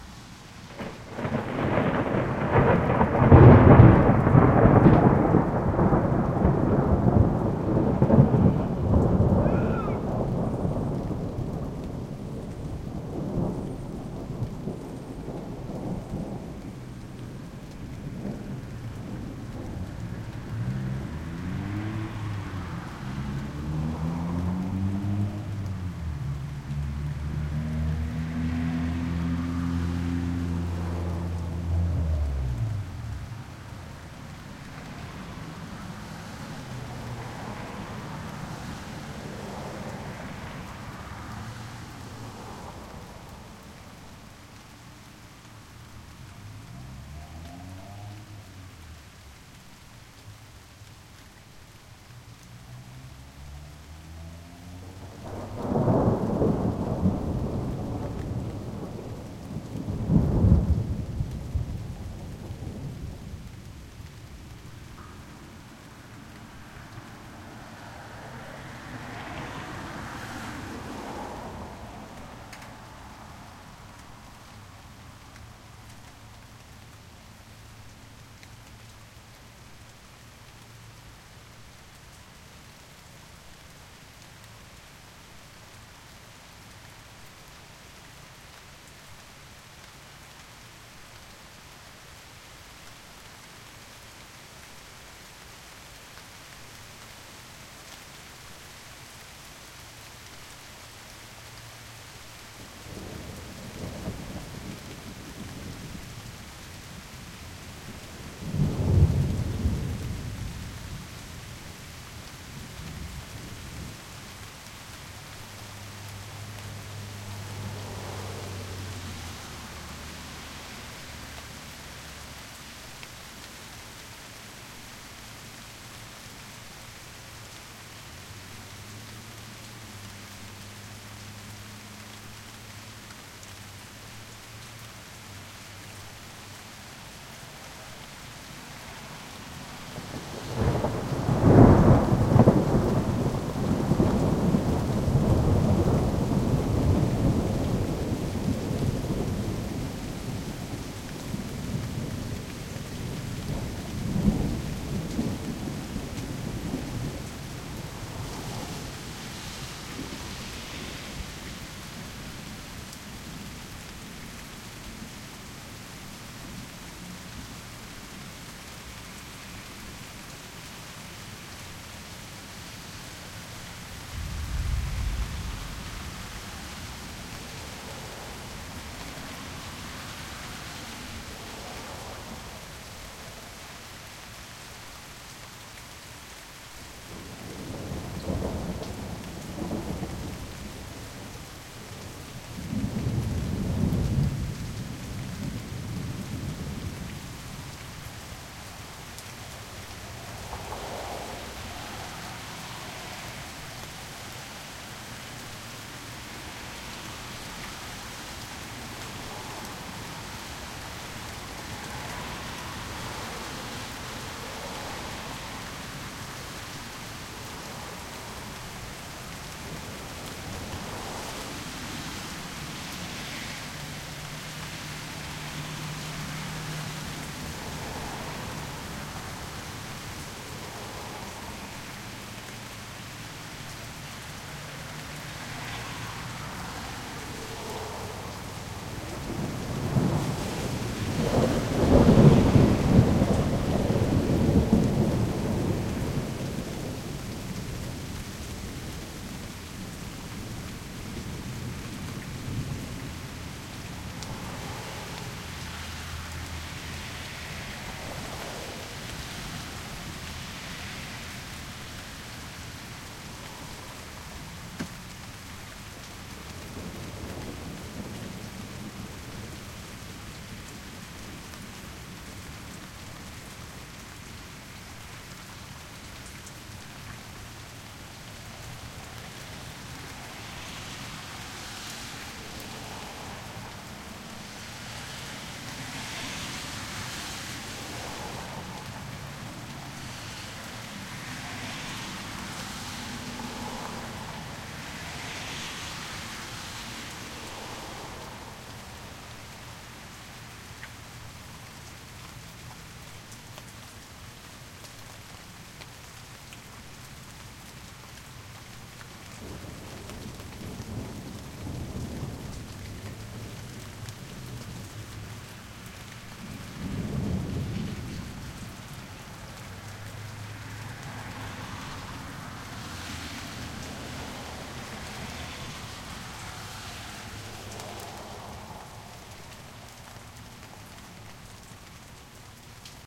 About five and a half minutes of mixed rain and thunder.
Some guy yells in the distance at the beginning, mostly clean.
field-recording, rain, thunder